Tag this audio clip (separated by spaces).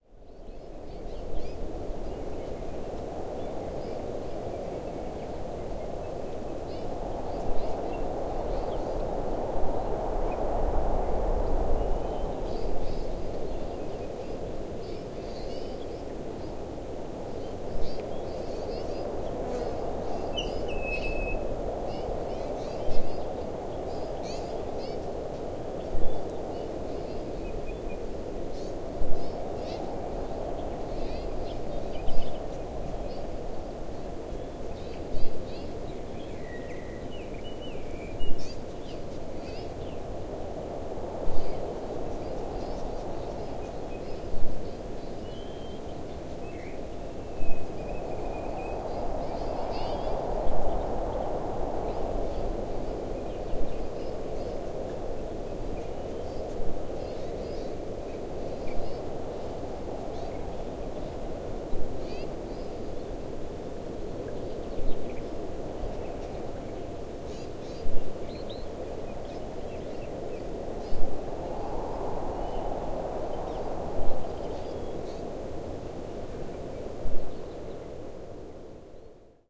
raven western-australia wind kalgoorlie ambience atmos crow Australia field-recording atmosphere australian insects desert nature birds